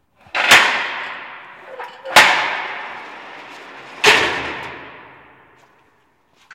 Cell door 3
Sounds recorded from a prision.
cell, close, closing, door, doors, gate, lock, metal, open, opening, prison, shut, slam